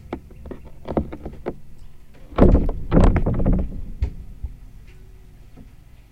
patio door01
Opening the door of a covered patio
thumping, thud, thump, thudding, banging, bang